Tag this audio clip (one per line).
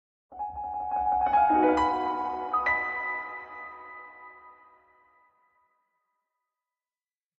conclusion
exclamation-mark